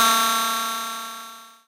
The Future Retro 777 is an analog bassline machine with a nice integrated sequencer. It has flexible routing possibilities and two oscillators, so it is also possible to experiment and create some drum sounds. Here are some.